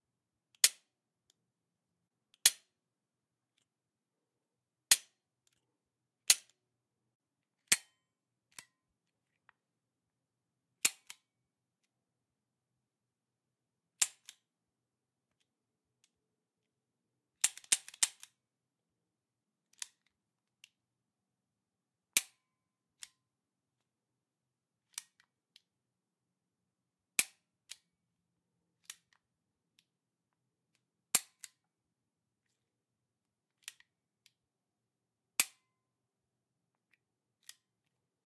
Pistols dry firing
A Glock 17 and a Walther PPK being dry fired (the click a ampty gun makes).
click, dry-fire, glock, gun, out-of-ammo, OWI, pistol, sfx, sound-effect